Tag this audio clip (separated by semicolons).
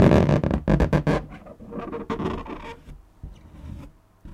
balloon; rubbing